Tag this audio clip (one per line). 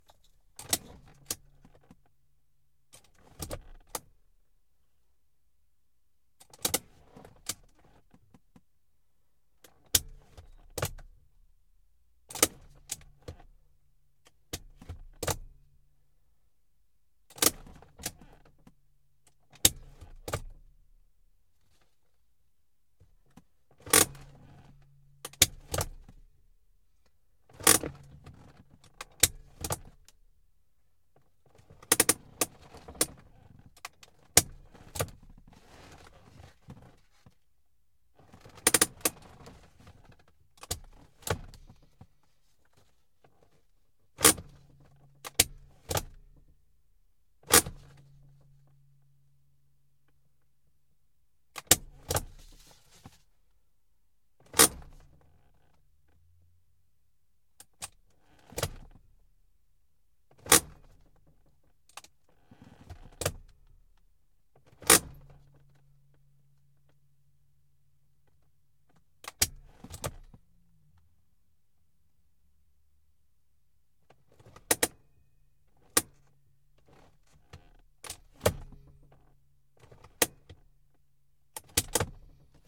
ebrake
handbrake
benz
vroom
dyno
car
dynamometer
engine
e-brake
mercedes
vehicle